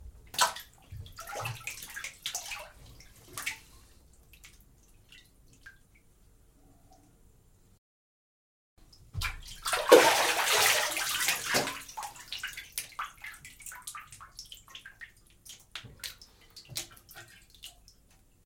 Actor immersion in a bath and getting out of the bath.
bath, getting-out, water-noise